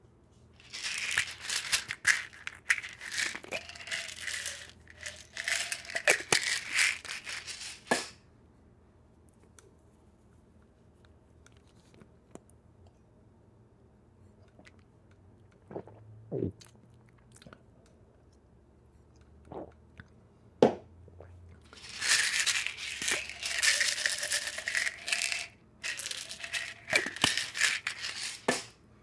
Opening pill bottle and swallowing pill
Sound of opening a prescription pill bottle, taking out a pill, and swallowing it.
Recorded on a StudioProjects B1 mic.
prescription, pill, medicine, bottle, drugs